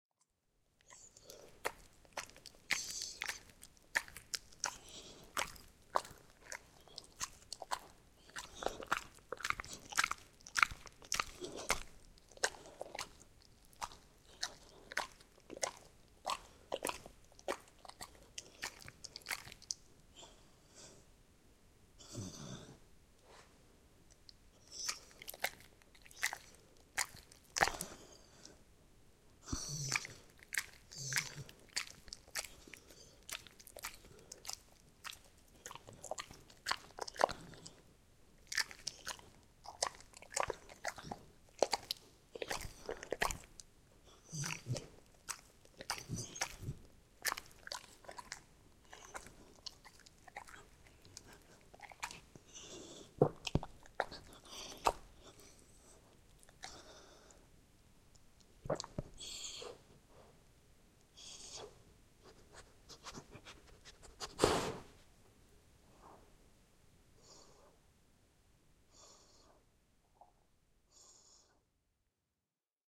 Dog eating chewing - squelchy, zombie, guts sounds
This is a sound of my Dog, who has 1 tooth and he is trying to eat a chew.
He is a King Charles Cavalier and is roughly 14 years old.
This sound can be used for many horror type things including zombie eating and guts.
horror, dog-eating